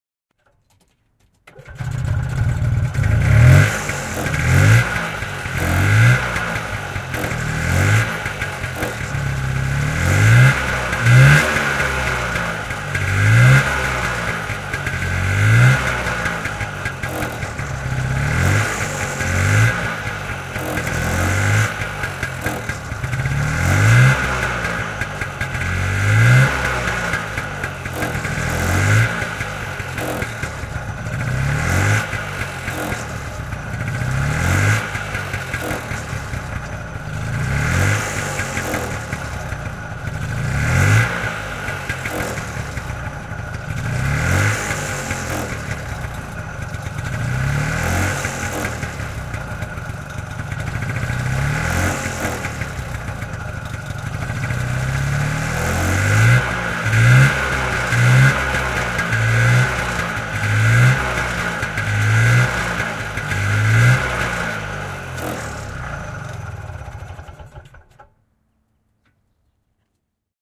Moottoripyörä, vanha, kaasutus / An old motorbike, start, revving, switch off, Messerschmitt, 191 cm3, a 1962 model, roofed
Messerschmitt, katettu, 191 cm3, vm 1962. Käynnistys, kaasuttelua, moottori sammuu, lähiääni. (Messerschmitt KR 200, 10 hv, 2-tahtinen).
Paikka/Place: Suomi / Finland / Riihimäki
Aika/Date: 02.09.1990
Yle, Motorbikes, Finnish-Broadcasting-Company, Tehosteet, Motorcycling, Field-Recording, Finland, Yleisradio, Soundfx, Suomi